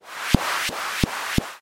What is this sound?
Percussive sweeping
Swishing with percussive-sounding repetitions.
Created using Chiptone by clicking the randomize button.
8-bit, 8bit, arcade, Game, noise, pinball, retro, SFX, sweeping, Video, Video-Game